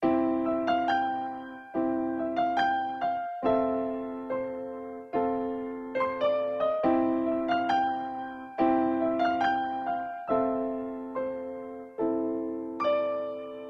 Lofi Piano Loop Cmaj 70 BPM
Cmaj, hiphop, melody, music, pack, packs, pianos